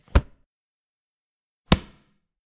Book Closing
Closing a book (two versions)
book, close, closing, read, reading, slam